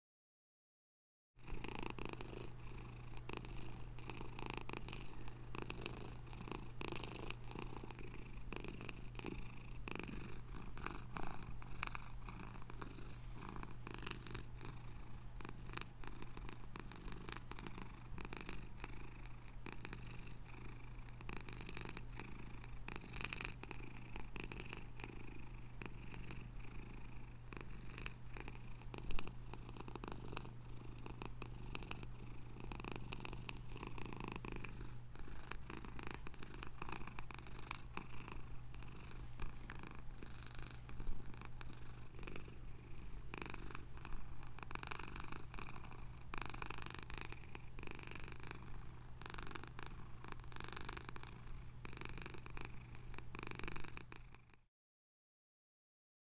Mono recording of a domestic cat purring close perspective.
There is some tone of a heater in the back ground I didn't have time to locate the source and turn it off.
ME-66 low pass filter - DA-P1.
cat, field-recording, house-cat, purr, purring